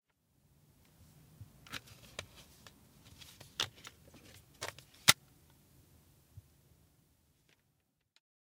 opening cd case
case, 2